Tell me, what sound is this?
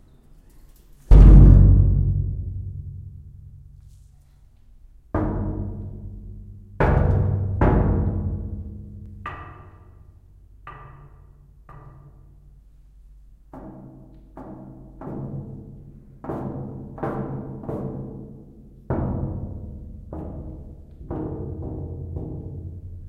low pitch sound done hitting a metal door. recorded with Rode NT4 mic->Fel preamplifier->IRiver IHP120 (line-in) / sonidos graves hechos golpeando una puerta de metal